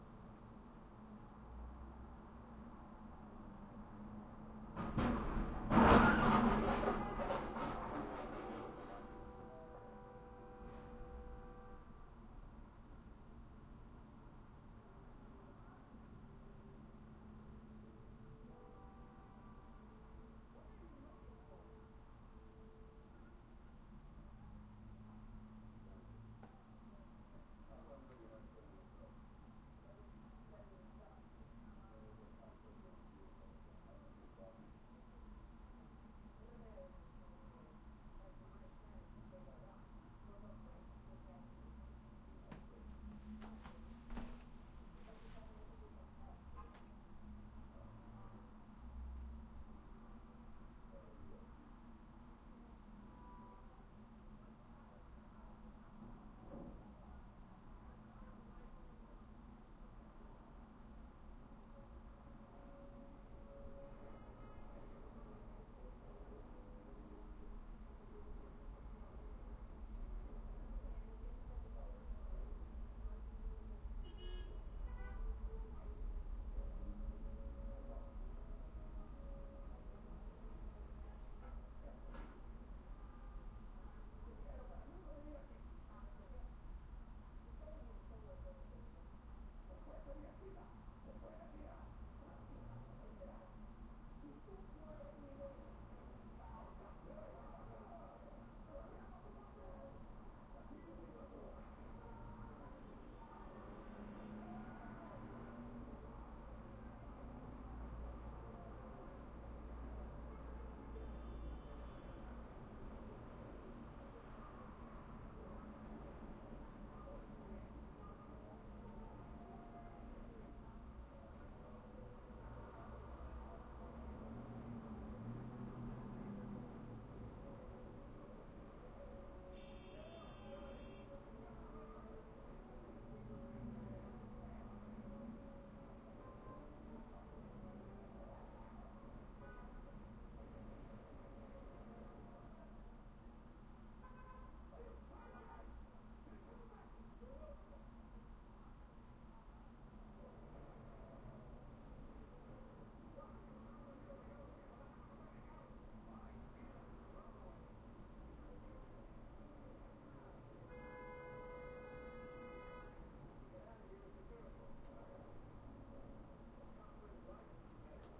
car crash interior ambience w television next door
Interior ambiance of an apartment, Brooklyn, NY. A car crash occurs outside. There is a television in the apartment next door that is heard through the wall playing some kind of drama with human voices and sound effects.
I could not resist posting this immediately without editing out the sound of my footsteps as I shifted to take a look out the window. I was trying to record some interior ambiance when this car crash happened 4 seconds into the recording. I have left the recording as-is to preserve the segue from the crash into the part with the television voices.
car, crash, interior, ambience